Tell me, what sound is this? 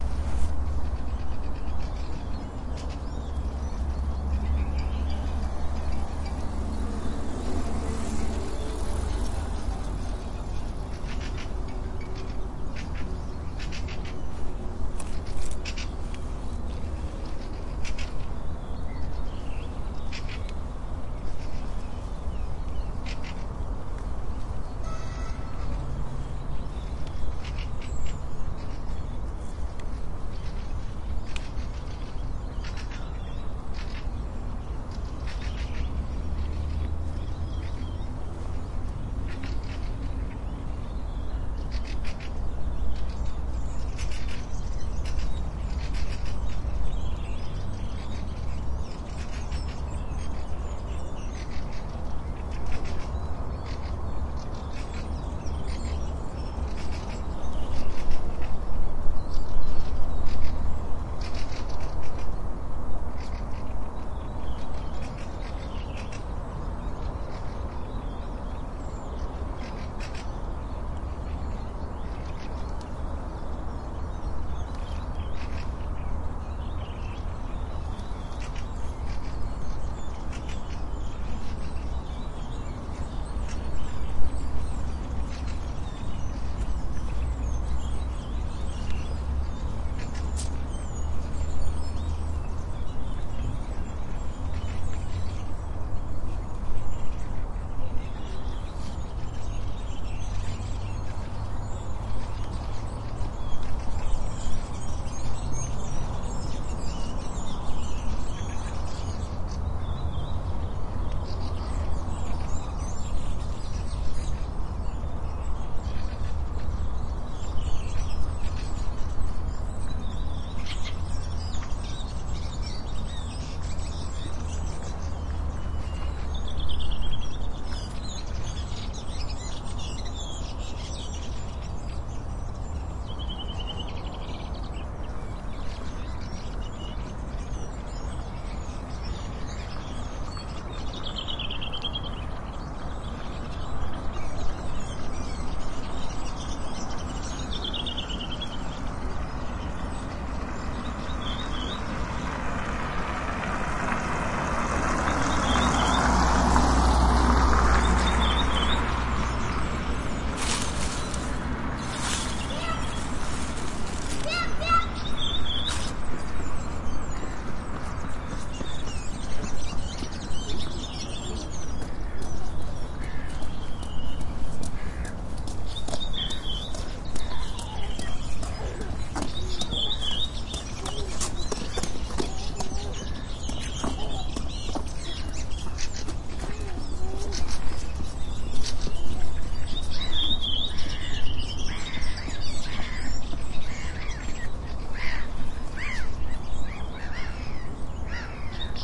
Birds singing in the park. A bicycle and a car passing by. Phone ringing. Some small town noises.
Recorded with Tascam DR-05
bicycle, birdsong, nature